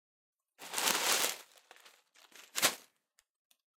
Sound of shoping in litle store. ( crackles....)
Panska, Shoping, Pansk, CZ, Czech